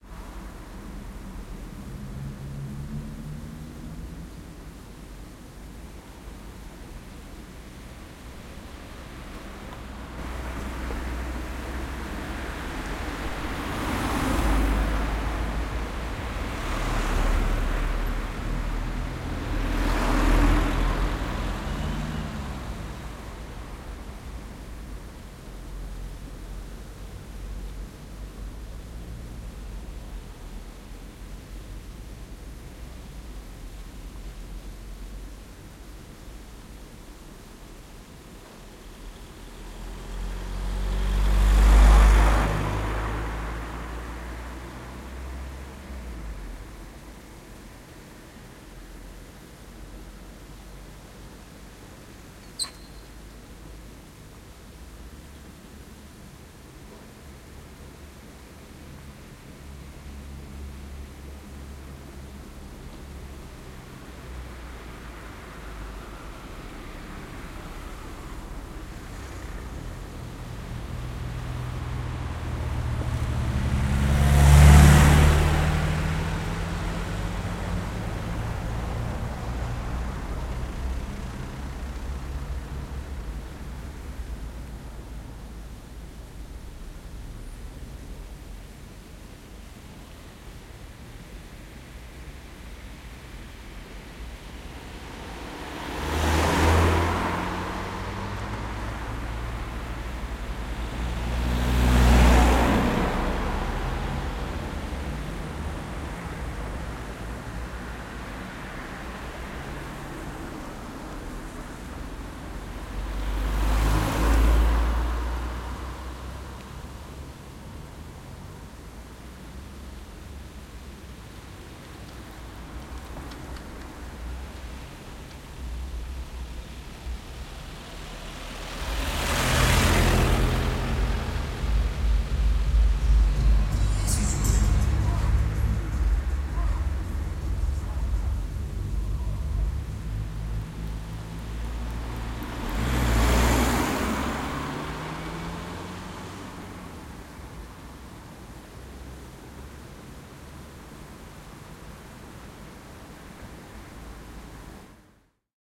tata hungary roundabout with fountain 20080718
Summer Friday night in the city, cars going by, the fountain in the middle of the roundabout babbles. Recorded using Rode NT4 -> custom-built Green preamp -> M-Audio MicroTrack. Unprocessed.
babble, cars, city, fountain, friday, hungary, night, summer, tata